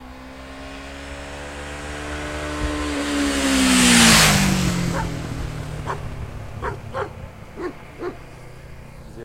Motorcycle passing by (Honda CBF500) 3
Recorded with Tascam DR-40 in X-Y stereo mode. Good, high quality recording. Dogs barking in the background.
tascam, motorcycle